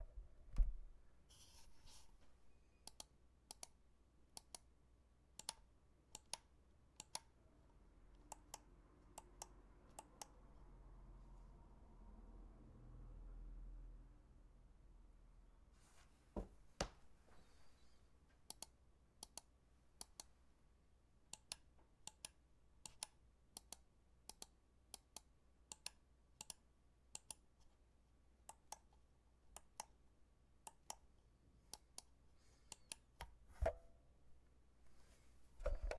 Mouse clicks
Recording of me right and left clicking my mouse